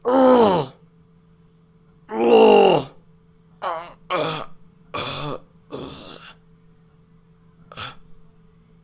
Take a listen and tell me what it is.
Hurt man sound
Hurt, man, sound